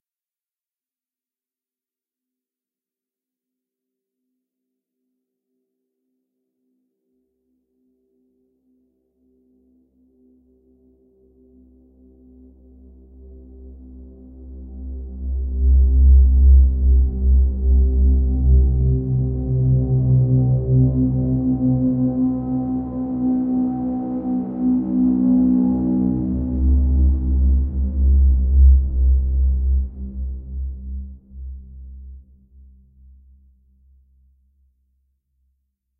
a synthetic physically modeled wind drone with lots of harmonics
steam, wind, overblown, growl, synth, feedback, organ, physical, modelling, pipe, Deep, bass, drone, noise, harmonics